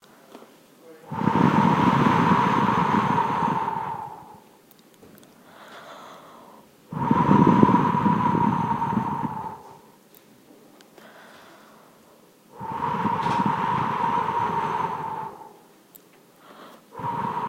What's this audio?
MySounds GWAEtoy Blowing
field, TCR